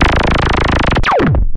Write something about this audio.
Space gun FX sound created with Created using a VST instrument called NoizDumpster, by The Lower Rhythm.
Might be useful as special effects on retro style games.
You can find NoizDumpster here: